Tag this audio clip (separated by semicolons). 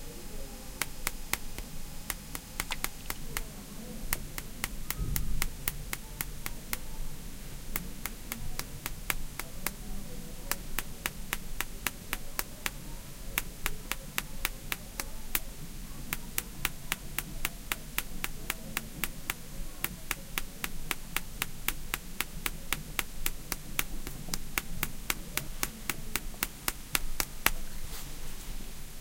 fel; fingernail; gear; hiss; iriver; preamp; soundman-okm; tapping; testing